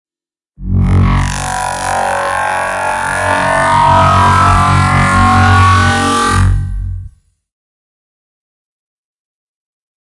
Laser Charge
The sound of a large laser powering up. Made with Studio One and all kinds of effects.